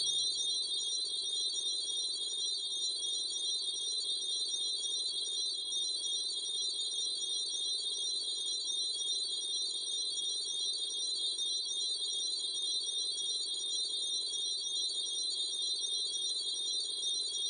electric-cicadas02
Several layers of bright loops - a useful bed for anything twinkling or glowing. Panning and tremolo effects.
windchime, electronic, digital, soundeffect, sound, metal, effect, glowing, glass